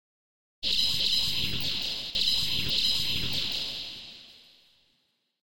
Heavy Laser Cannon
A laser noise created for my science fiction sitcom. The base was just a note from a VST synth (I can't remember which) and then a variety of pitch shifts, time stretches and layers of reverb until it sounded right.